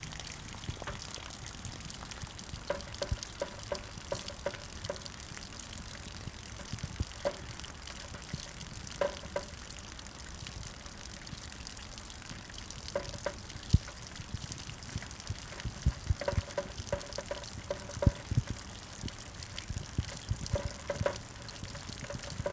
A dripping gutter water spout during a light rain.